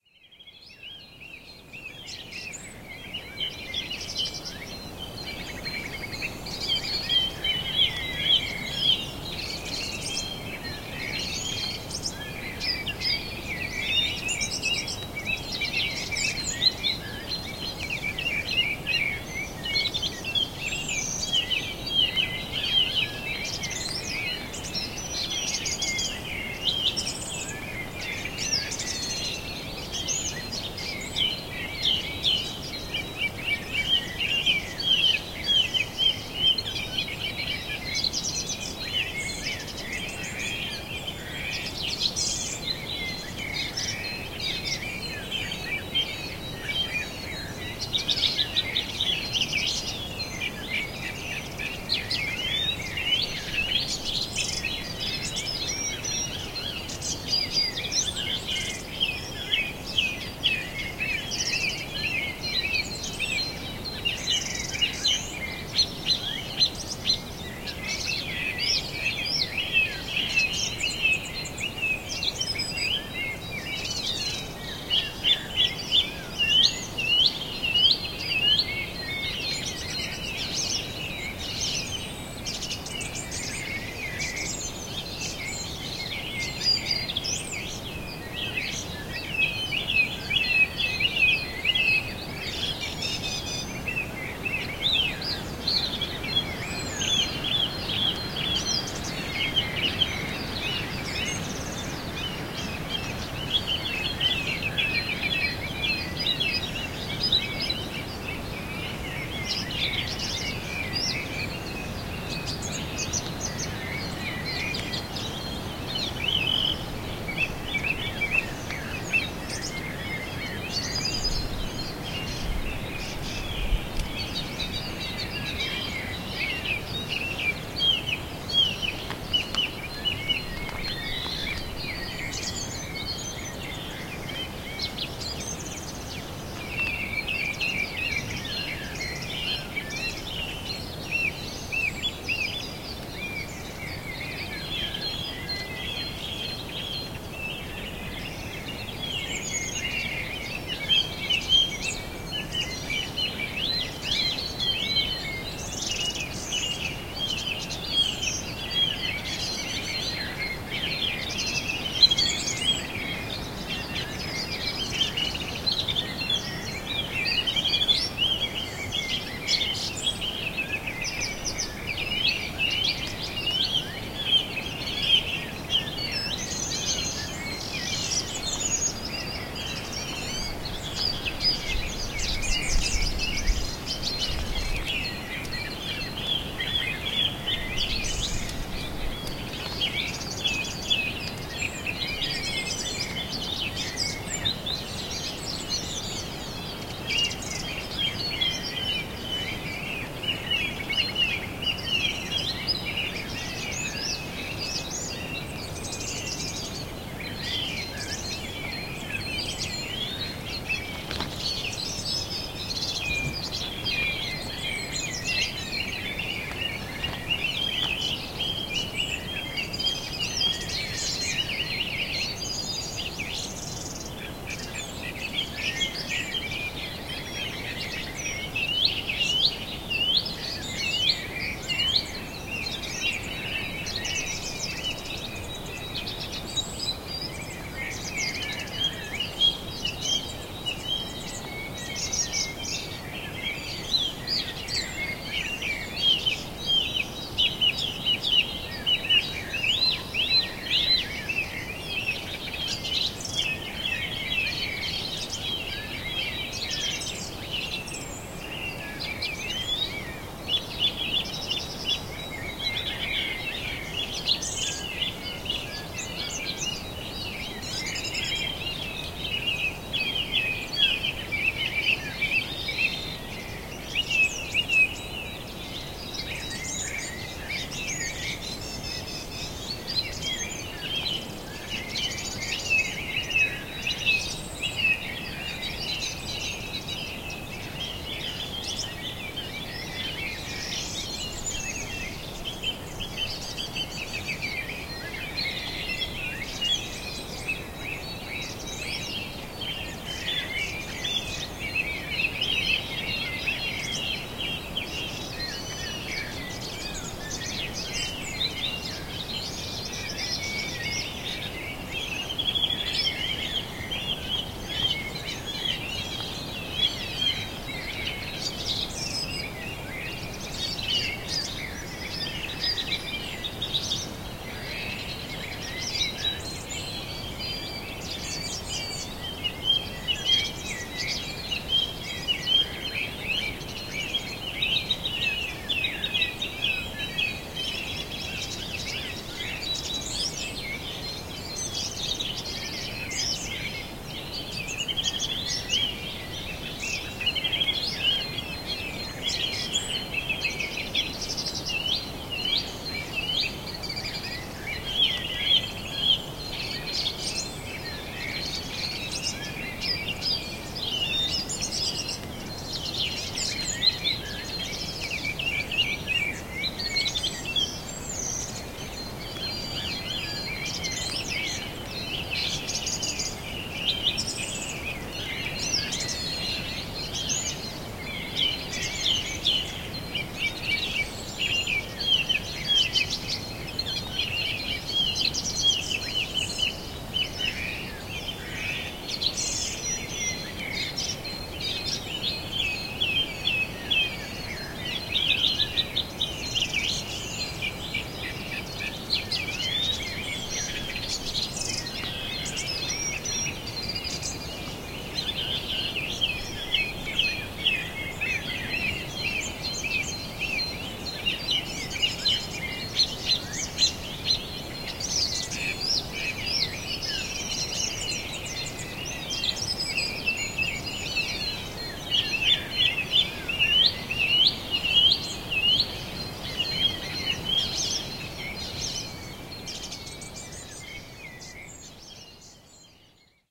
Full-Chorus
Dawn chorus on a windy morning, on Cumberland Campsite in Fort Augustus.
birds, bird-song, dawn-chorus, field-recording